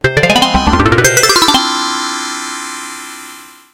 I made these sounds in the freeware midi composing studio nanostudio you should try nanostudio and i used ocenaudio for additional editing also freeware
application, bleep, blip, bootup, click, clicks, desktop, effect, event, game, intro, intros, sfx, sound, startup